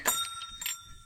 Blizzard Bells
Santa's in a blizzard in the North Pole! But you can still hear his bells! These bells were recorded on a windy day in the suburbs of Michigan. These are your typical bronze bells being slammed against a glass window on a door as the door was being shut.